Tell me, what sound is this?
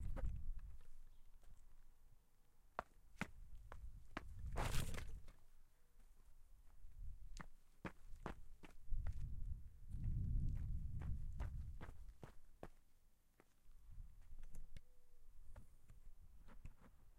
I had recorded these steps for my action movie. These are steps on my garden lawn.I hope that it will help you in your movie projects.